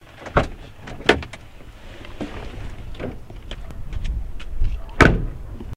Someone getting out of their car
door, car, exit, shut, closing, vehicle, slamming, slam, close, leave, open, opening, shutting